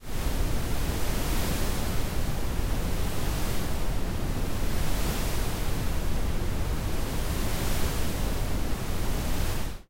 QUERRE Meline 2020 2021 OceanOutdoor
This sound is entirely made with Audacity, I played with the noised and reverberation on several tracks, then I shifted the tracks to create a come and go effect. I also reduced the volume on some parts of the tracks. This sound remind me of a windy weather near the ocean.